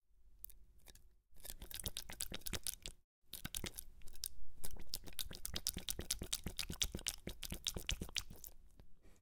foley cat kitten licks licking up milk India
licks, milk, cat